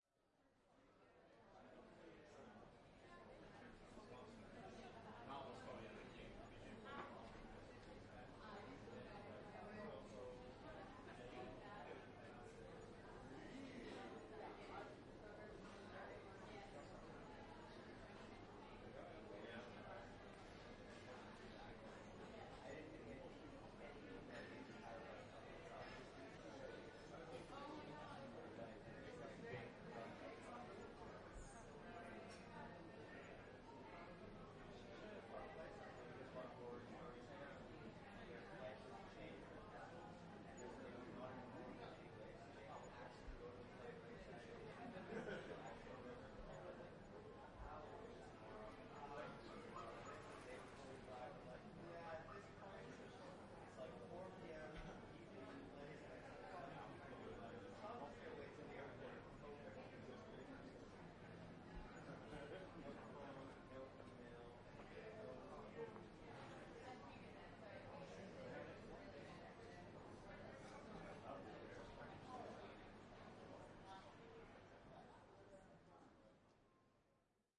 Amsterdam Atmos - de Pijp - Young male & female foreigners chatting @ a terrace, medium light
Many students and workers (mostly international) chat at a terrace on a Friday night. Mostly young male & female voices. Lively, always busy. Generation Y & Z. Recorded outside on a small square, surrounded by 3-story buildings. medium light.